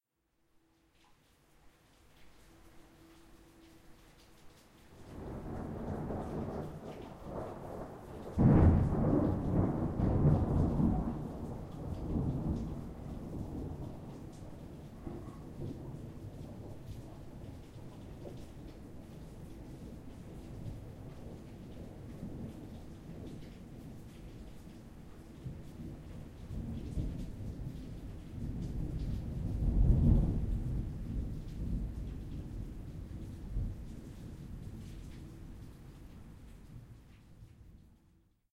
Strong thunder clap recorded in Pretoria South Africa. Recording done on Zoom H1 handy recorder.